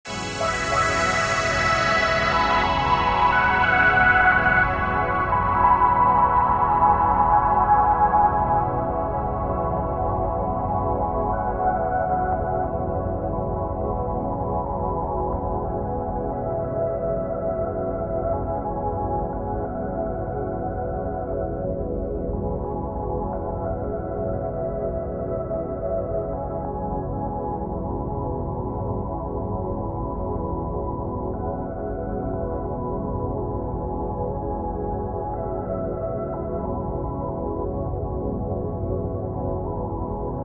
Once I made these pads for yourself. They are in my music. But you can use them totally free.
soundscape, texture